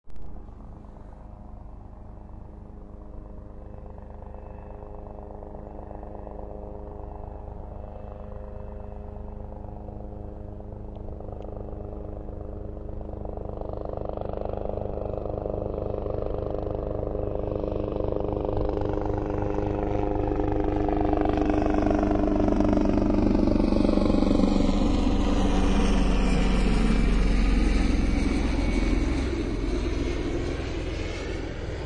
Helicopter flyby over airfield sound.